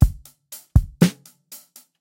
Funky Beat Half Time
120-bpm, Half-time, Loop, Rock